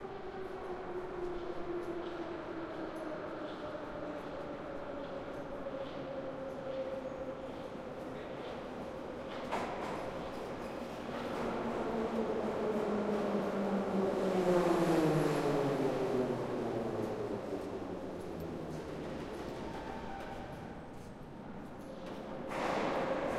Moscow metro station ambience.
Recorded via Tascam DR-100MkII.